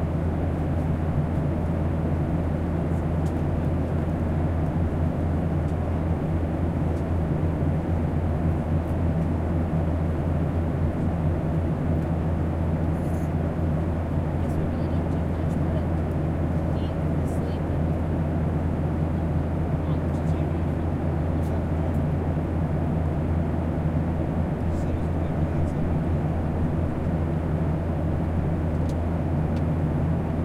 Airplane Ambience
I recorded the inside of an airplane for my library, although you can hear some chatter and noises from the rest of the travelers. Recorded XY with Zoom 4 Mobile Recorder
Ambience, Airplane